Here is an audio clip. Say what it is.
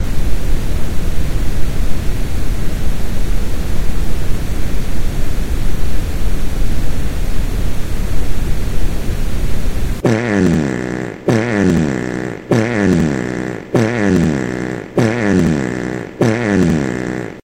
tv channel noise
noise, white